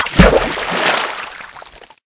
Prototypical sound of water splash. Can be object or person in pool or ocean.
water, splash